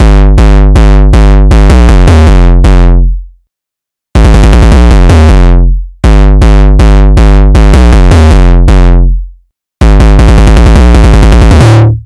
8-bit Gabber Hardcore Kick
Kicks from my 8-bit inspired gabber track. Distorioneee.
rhytm, rhythmic, electronic, distorted, song, hakkuh, rhythm, hakken, techno, bassy, hardcore, 8, chiptune, eight, gabber, bits, 8-bit, distortion, bass, track, gabba, chip, 8-bits, bit, lo-fi, house, beat, electro, eight-bit, sega